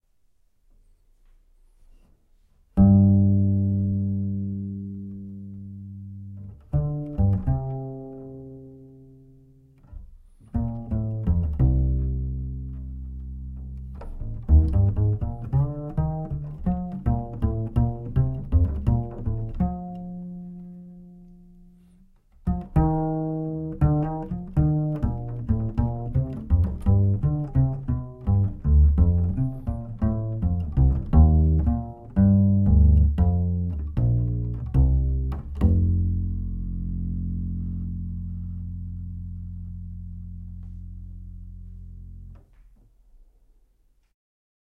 Acoustic bass test 1
1, acoustic, test, superlux, u3, clamp, field, near, s241, bass, recording, bridge